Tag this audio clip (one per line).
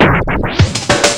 dj
drum
jungle
loop
percussion
scratch
scratching
vinyl